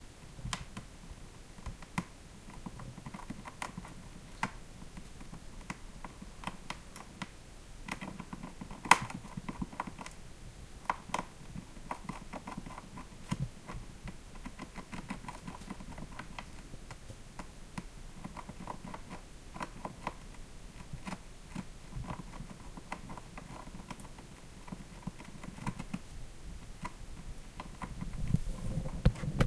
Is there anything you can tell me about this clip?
This is the sound of a rat gnawing its way down through our wooden ceiling (and 2 days after this recording there was a hole about an inch wide in the ceiling!)
Recorded July 15th 2015 around 10 o'clock in the evening in the livingroom of my house in Fredensborg, Denmark, with a portable recorder ZOOM H2n.
rat, ceiling, animal, wood, indoors, house, gnawing